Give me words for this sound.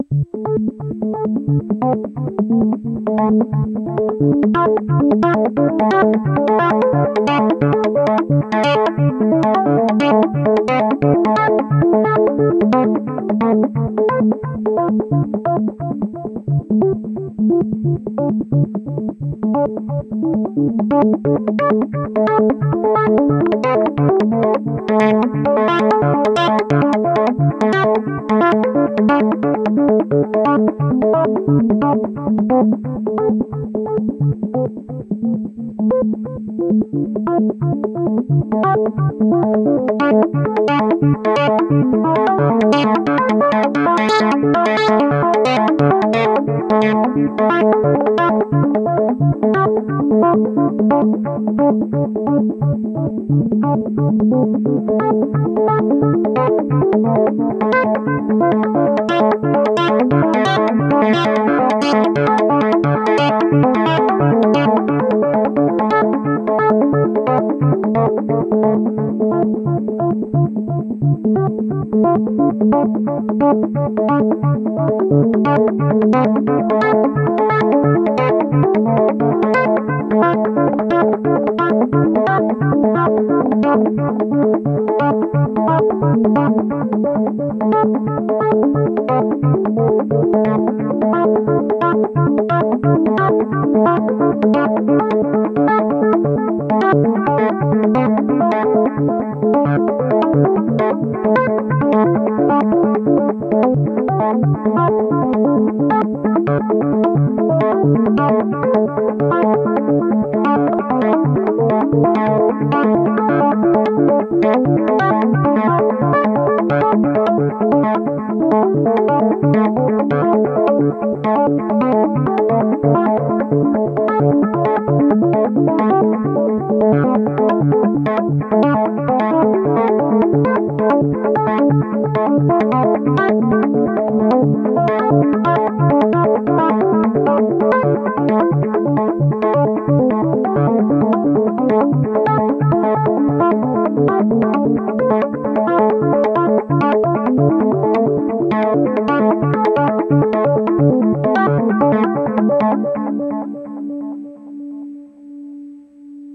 Semi-generative analog synth sequence (with delay) in random keys.
One of a set (a - h)
Matriarch self-patched & sequenced by Noodlebox
minimal post-processing in Live